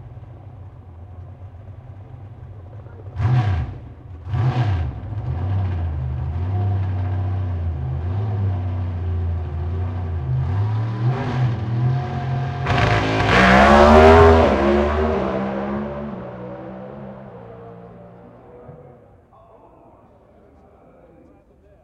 Recorded using a Sony PCM-D50 at Santa Pod raceway in the UK.